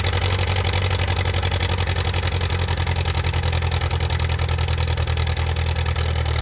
JCB Engine High Revs
Buzz, electric, engine, Factory, high, Industrial, low, machine, Machinery, Mechanical, medium, motor, Rev